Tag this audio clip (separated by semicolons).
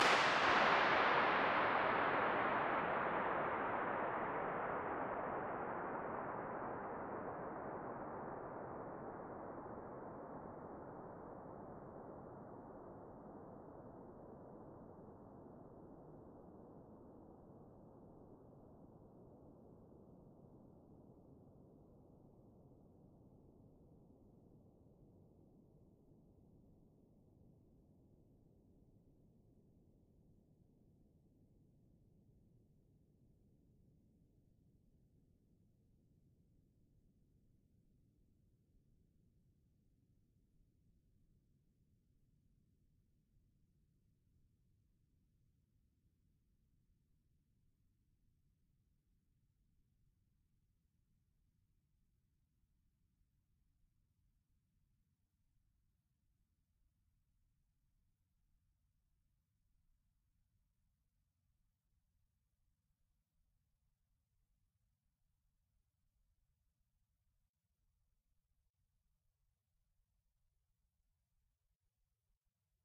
Allan-Kilpatrick; echo; guinness-world-record; inchindown; longest-echo; oil-storage; oil-tank; rcahms; reverb; reverberation; reverberation-time; Salford-University; scotland; sonic-wonderland; the-sound-book; trevor-cox; tunnel; uncompressed